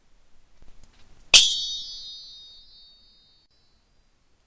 Two swords clashing, use for whatever you would like.